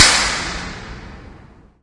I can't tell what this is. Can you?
newgarage floor4
New parking garage, all fancy pants. Recorded with cap gun and DS-40. Most have at least 2 versions, one with noise reduction in Cool Edit and one without. Some are edited and processed for flavor as well. Most need the bass rolled off in the lower frequencies if you are using SIR.
response, ir, convolution, reverb, impulse